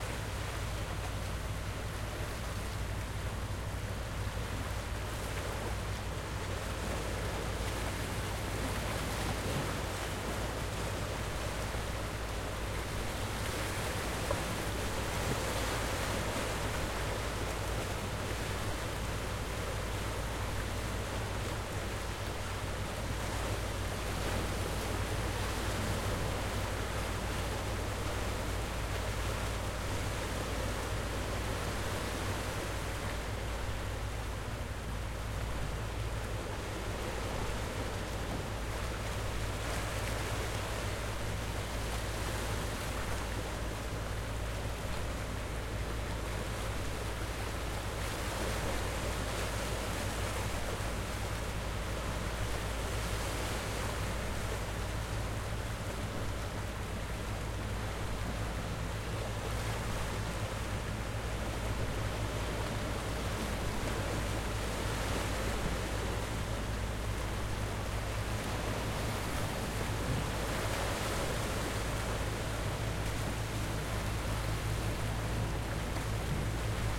03 Lanes Island Water 2 LowBoat 48 24
Ocean water crashing on rocks on the Maine coast. Low hum of fishing boat can be heard in the background.